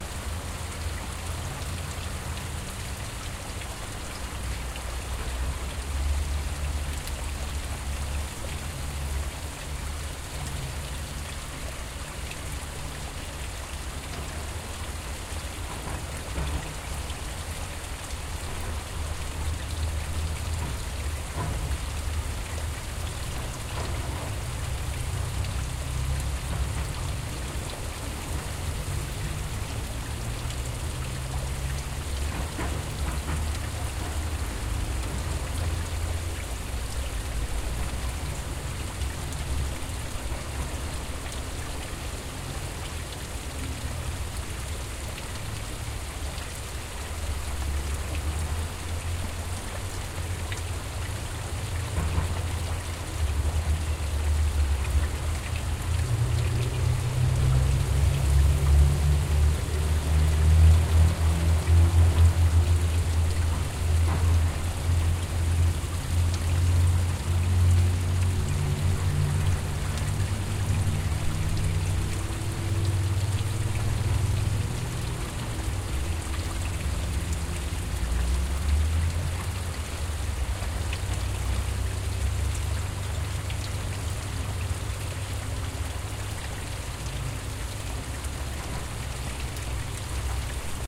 Waterfall from wastewater pipe on the riverside near Leningradsky bridge.
Recorded 2012-10-13.
XT-stereo